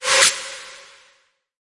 AI FX Birdeath 2
A self-made jungle terror sound
Electro, Room, Terror, House, Big, Jungle, Wiwek